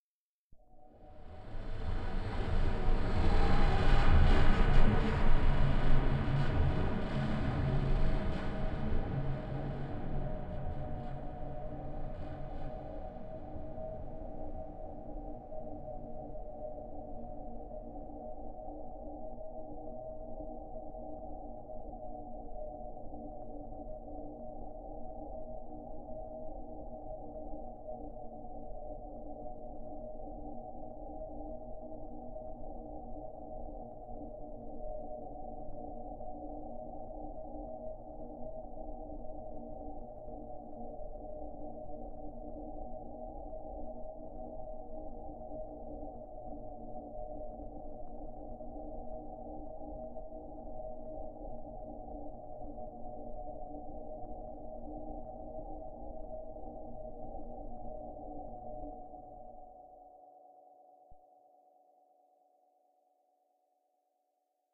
made with vst instruments
noise, background, futuristic, emergency, drone, deep, machine, bridge, soundscape, impulsion, drive, ambient, space, ambience, pad, effect, starship, spaceship, dark, future, sci-fi, electronic, fx, Room, hover, energy, rumble, engine, atmosphere, sound-design